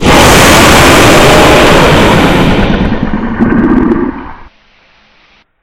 Dragon Roar
I made this sound with some recordings of me (basically being a dork) coughing, growling, snorting, blowing into the microphone and more.
It would be a great sound for a game or animation or movie, whatever you want.
If you do use this sound, please tell me and leave a link to it, I'd love to see how you use it.
Roar, Creature, Dragon, Growl, Monster